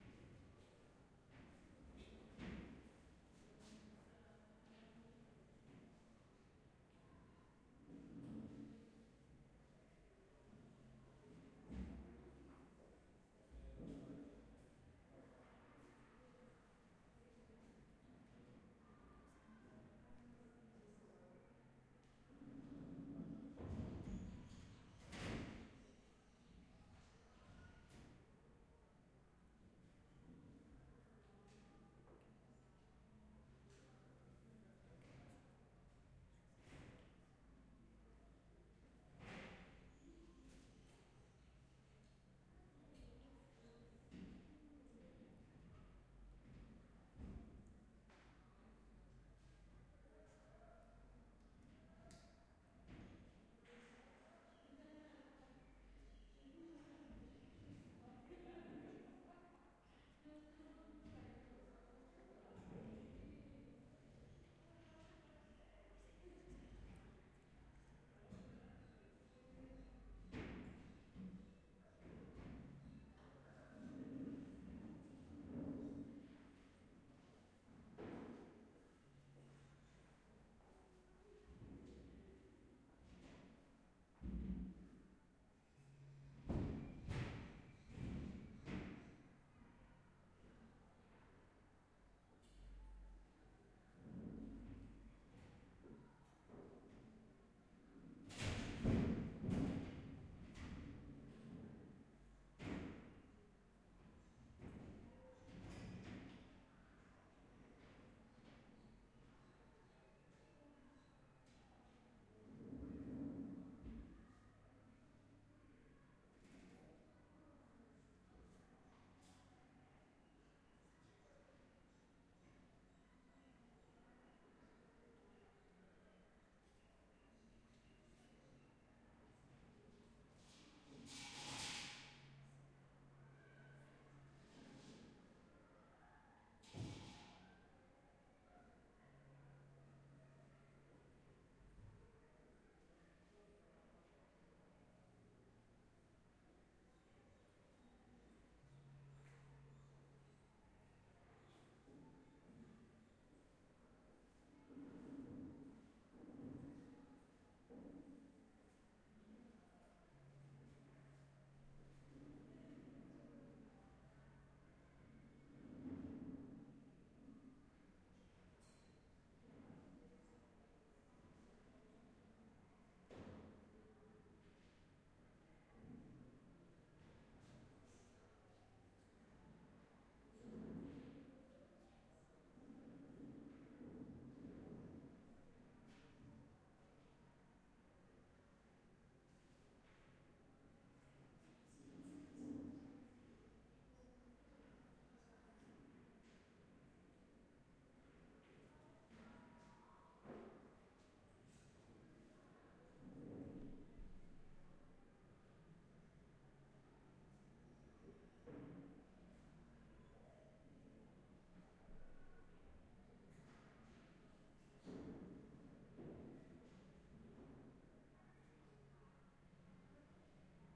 Loopable empty classroom wild sound
A loopable wild sound from inside a empty workshop class room , recorded with a zoom H6
Ambiance, Loopable, class-room